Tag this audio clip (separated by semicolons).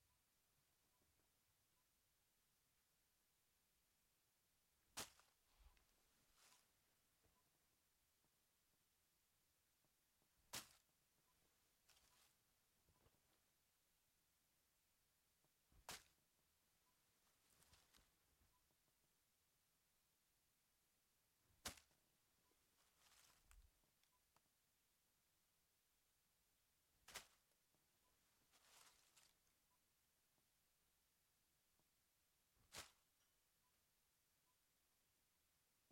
Clean; Clothing; Foley